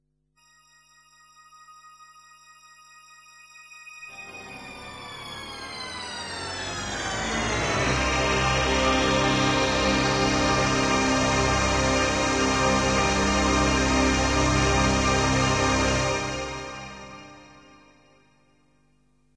cvp85A zen jingle
A THX inspired fanfare made on a Yamaha Clavinova CVP-85A using the Cosmic 1 patch, sequenced in Cakewalk Pro Audio 8 using multiple tracks with different keys, expression and pan curves, and pitch bends.
Although not so professional-sounding, it has quite the flavor, texture and "spacy-ness". Enjoy :)
cosmos, space, fanfare